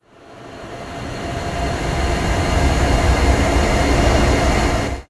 STECZYCKI Ronan 2016 2017 Gust
I recorded the sound of a breeze, and began by added a fade-in. I then tried to amplified the sound without it being to loud, added a reverberation effect and lowered the speed a little. I cut the end of it and repeat it to extend the effect. I tried to make it sound like what was a simple breeze is in fact a wind gust.
Typologie : X
Morphologie : son tonique
Timbre harmonique: terne
Grain: rugueux
Allure: non
Dynamique: violente mais graduelle
Profil mélodique: glissantes
wind, breeze, gust, blow